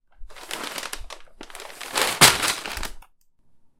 Grocery Drop
crinkling of paper grocery bag then dropping groceries
paper, groceries, bag, grocery